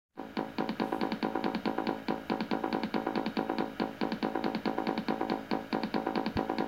Bassline criada usando LMMS. Gravada usando smartphone Galaxy S7, audio recorder for Android. Bassline de minha autoria. Programa LMMS versão 1.1.3. usando o instrumento Kicker.
electronic, loop, Linux